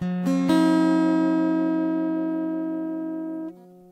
Samples of a (de)tuned guitar project.